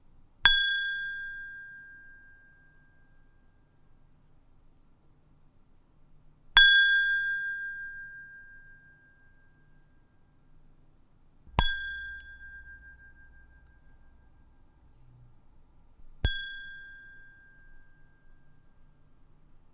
Glass ding 2
Just a glass ding. Sounds like litte bell.
Microphone AT-2020
ding, bell, ringing, glass, jing